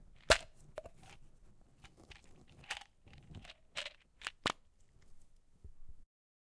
Opening and closing a plastic container full of bubblegum
This is an Orbit bubblegum container being opened and closed to get the popping sound.
bottle, bubblegum, container, percs, plastic